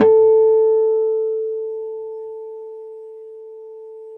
A 1-shot sample taken of harmonics of a Yamaha Eterna classical acoustic guitar, recorded with a CAD E100 microphone.
Notes for samples in this pack:
Harmonics were played at the 4th, 5th, 7th and 12th frets on each string of the instrument. Each position has 5 velocity layers per note.
Naming conventions for samples is as follows:
GtrClass-[fret position]f,[string number]s([MIDI note number])~v[velocity number 1-5]
The samples contain a crossfade-looped region at the end of each file. Just enable looping, set the sample player's sustain parameter to 0% and use the decay and/or release parameter to fade the sample out as needed.
Loop regions are as follows:
[150,000-199,999]:
GtClHrm-04f,4s(78)
GtClHrm-04f,5s(73)
GtClHrm-04f,6s(68)
GtClHrm-05f,3s(79)
GtClHrm-05f,4s(74)
GtClHrm-05f,5s(69)
GtClHrm-05f,6s(64)
GtClHrm-07f,3s(74)
GtClHrm-07f,4s(69)
GtClHrm-07f,5s(64)
GtClHrm-07f,6s(59)
GtClHrm-12f,4s(62)
GtClHrm-12f,5s(57)
GtClHrm-12f,6s(52)
[100,000-149,999]:
GtClHrm-04f,3s(83)
velocity, 1-shot, acoustic, guitar, multisample